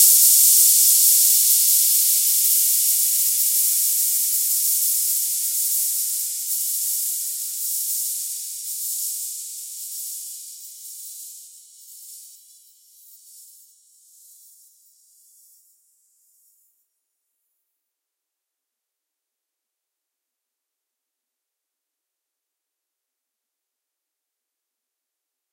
Layer this sample with any cymbal sample to instantly turn it into a sizzle cymbal! This is the sound of the rivets rattling on the surface of the cymbal including louder and softer sounds as the cymbal sways.